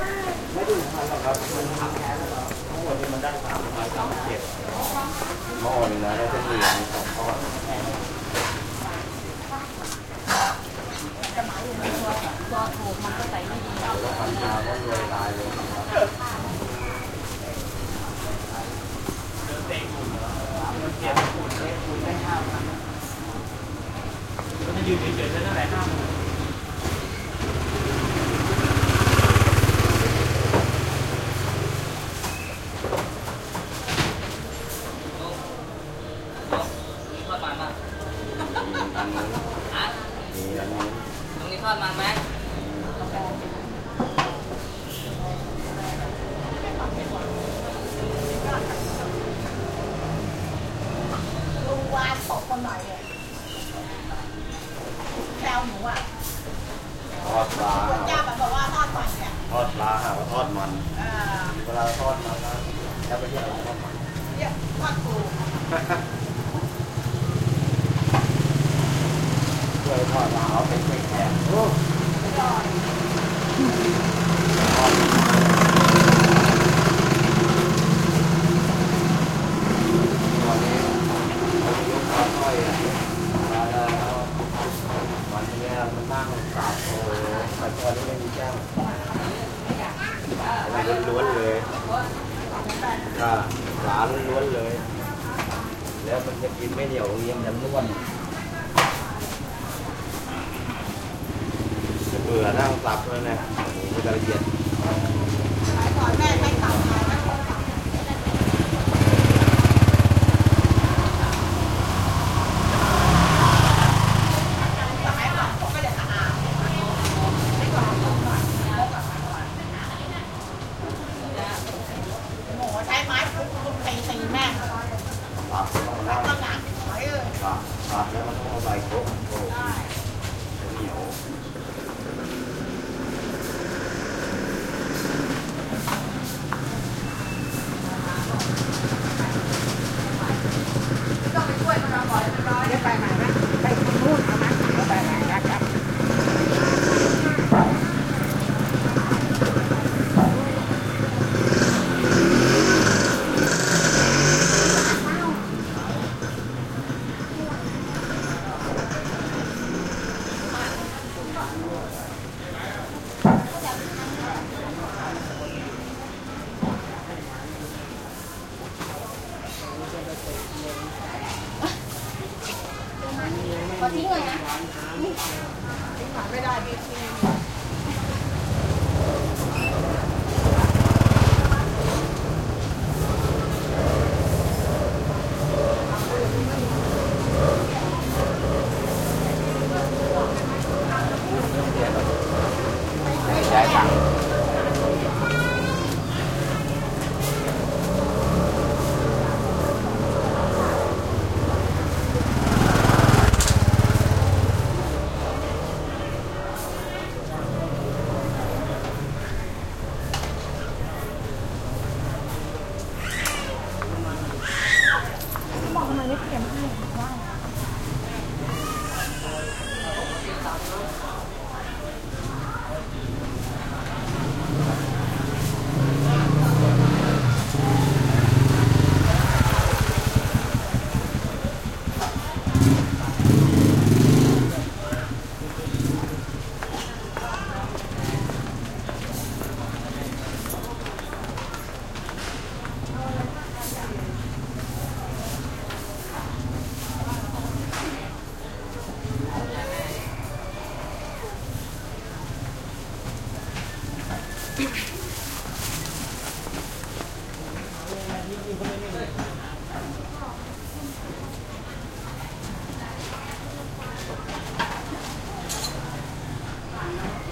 Thailand Bangkok side street market morning activity and motorcycles6
Thailand Bangkok side street market morning activity and motorcycles